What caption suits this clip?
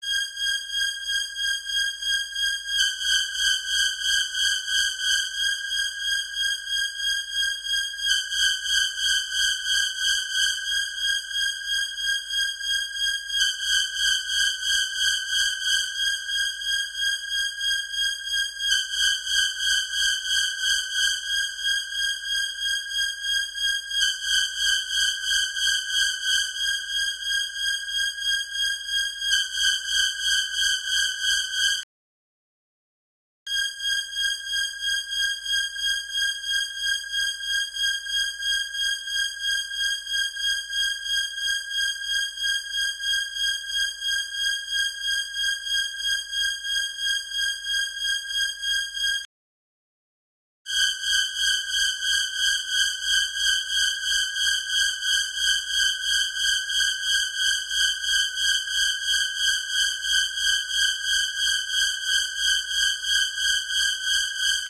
slasher horror
The original user is "memz" and the title they made is "Thriller Score Horror Scene". The only thing I did was rearrange the audio so that it echoes in and out.
If you appreciate the sound, give "memz" the accolades and not me.
eerie, horror, horror-fx, loop, loopable, scary, slasher